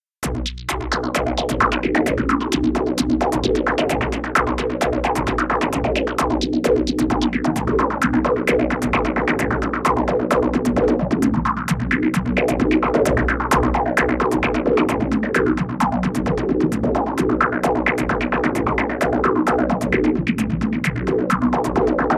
motion delay sound 1
sound crested in ableton with motion delay effect.
sound, dance, motion, electronic, loop, ableton, delay, techno, live